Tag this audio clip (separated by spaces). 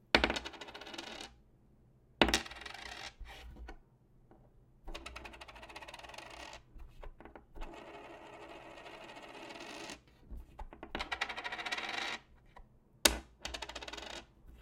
bouncing Coin fall tossing